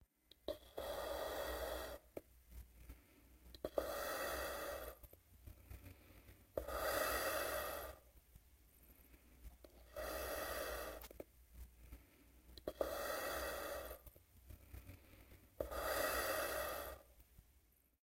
Mascara De Gas 2
foley sounds of gas mask part 2
breath, gas, mask